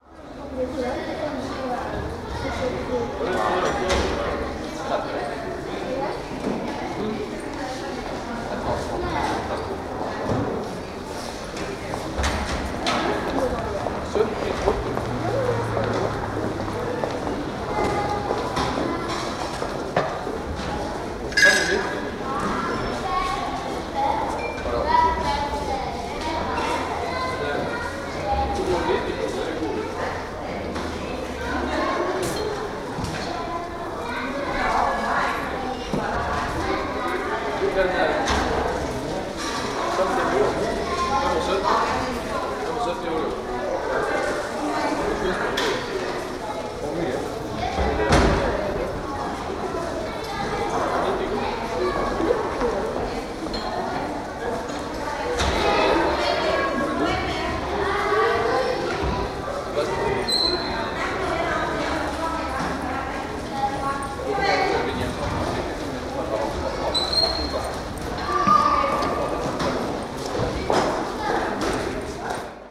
01-Osterport WaitingHall
Osterport Station in Copenhagen. Recorded in mono on 31st November 1980 on a Tandberg tape recorder at 3 3/4 ips with a dynamic microphone. Ambient sound from persons and one dog in the waiting hall with some kiosks.
waiting-hall, railway-station, ambience